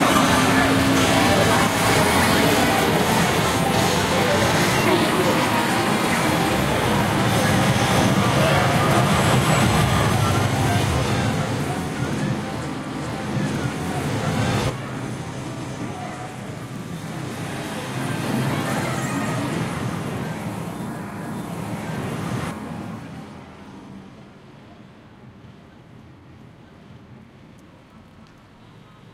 Japan Matsudo Pachinko Short
I recorded the sound of several pachinko parlors (vertical pinball machines, for recreation and gambling), in Matsudo, Chiba, east of Tokyo. Late October 2016. Most samples recorded from outdoors, so you can hear the chaotic cacophony of game sounds when the doors open.
Chiba, Arcade, Cacophonic, Game, Casino, Chaotic, Gambling, ZoomH2n, Urban, Stereo, Japan